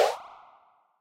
A basic sound effect for a video game.